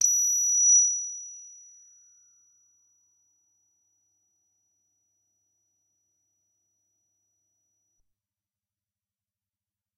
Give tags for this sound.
synthetizer; midi-note-120; midi-velocity-53; cs80; multisample; analogue; ddrm; C9

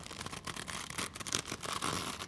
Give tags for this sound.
tense; tight; twisting; paper; tension; twist

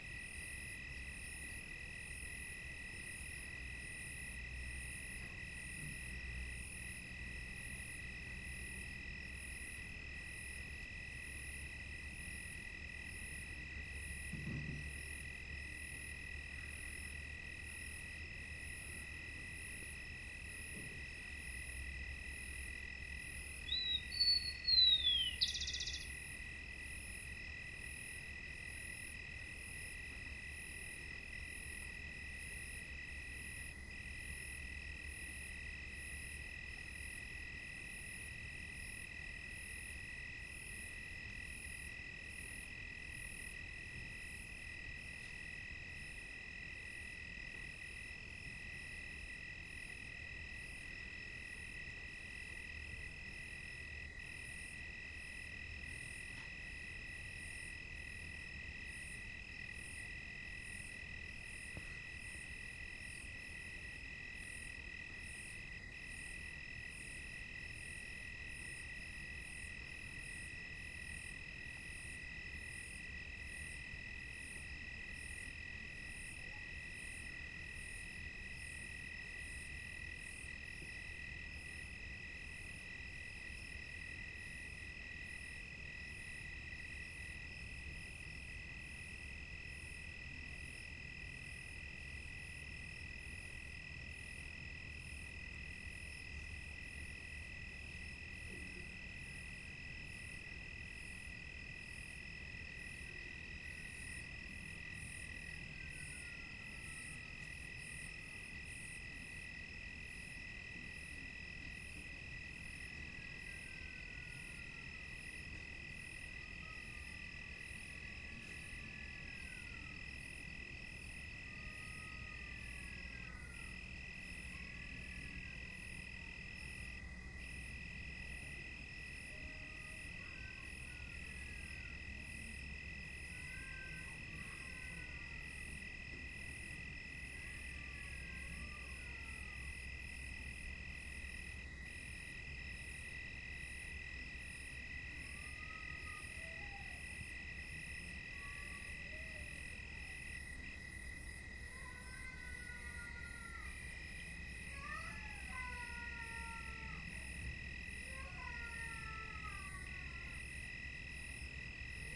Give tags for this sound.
night; cicadas; residential; crickets; Colombia

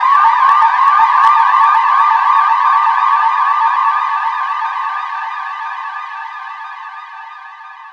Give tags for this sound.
Alarm; edited

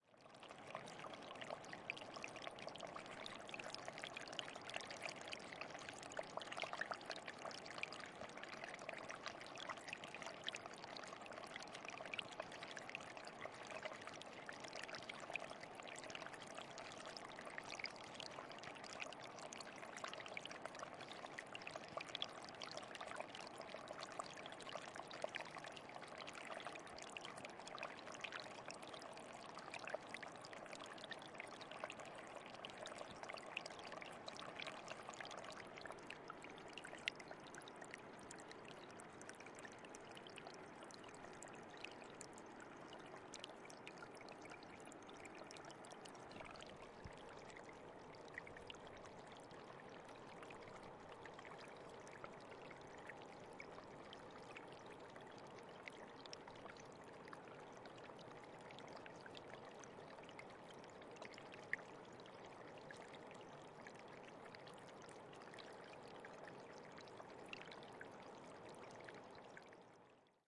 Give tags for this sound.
Italy; closeup; glacier; gletscher; melt-water; melting; montblanc; mountain; river; stream; water

